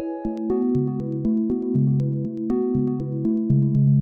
a5sus2 arpeggio stab glassy synth clicky low freq atmosphere-10
a5sus2 arpeggio stab glassy synth clicky low freq atmosphere
rave, trance, dance, clicky, house, bass, club, electro, techno, electronic, ambient, arpeggio, stab, freq, synth, a5sus2, loop, atmosphere, glassy, music, low